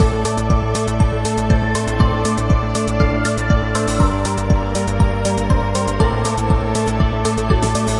short loops 16 02 2015 2
made in ableton live 9 lite
- vst plugins : Alchemy
you may also alter/reverse/adjust whatever in any editor
please leave the tag intact
gameloop game music loop games techno house sound melody tune